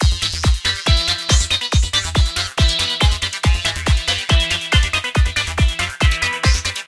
TR LOOP - 0502
goa goa-trance goatrance loop psy psy-trance psytrance trance
loop, trance, psytrance, goatrance, goa-trance, psy-trance